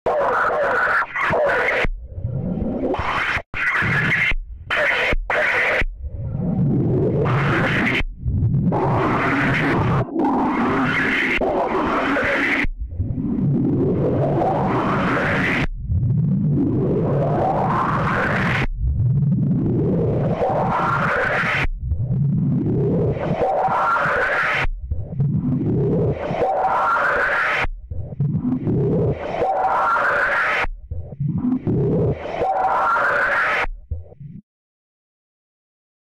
jovica flowerLoop-80 bassline
sphere, remix, texture, atmos